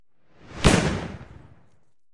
explosion mid fuse 1
Explosion with short "fuse" before the bang. Made of multiple firework-recordings.
explode, eruption, dynamite, movie, burst, bang, soundeffect, boom, fuse, sfx, tnt